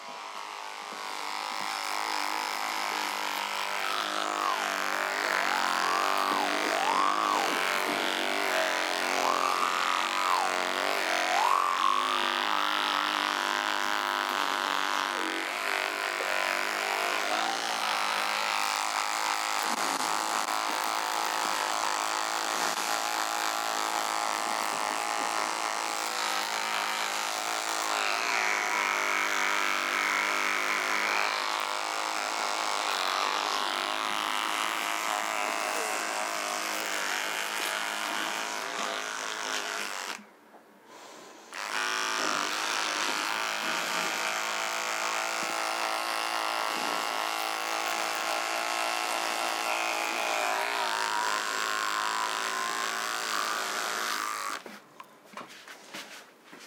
electric toothbrush
Recording of an electric "oral-B" toothbrush in a tile bathroom
recording path: Sanken Cs2 - Zoom F8
electrical, tooth, toothbrush